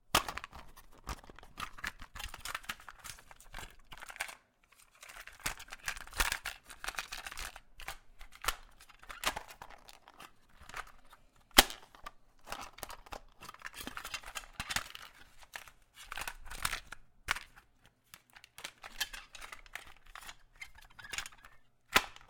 cassette manipulations
Manual handling of a cassette opening and closing the case removing and storing the cassette
AudioDramaHub, button, cassette, machine, postproduction, sfx, sound-design, tape